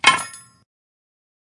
To transform chains into something else.
Recorded with a Tascam Dr100 in Santa Cruz.